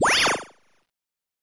This sound effect was created on SunVox app.